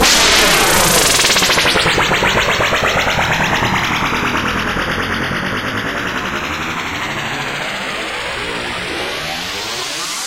This sound is suitable to rapidly mix inbeween the often so cool musical previews mix flashes, or in a fading tail of a commercial jingle.